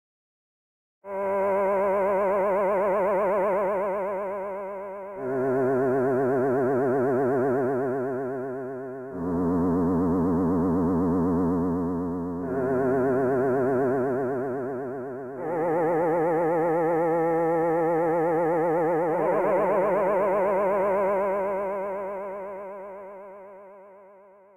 A quirky digital noise type sound. The sound is played in different frequences and can be cut with ease. Can be used for all types of movie effects and in music. Enjoy!